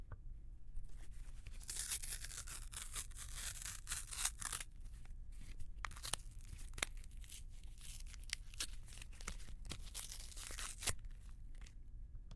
ripping some paper
paper, rap, rip